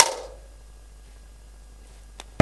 The dungeon drum set. Medieval Breaks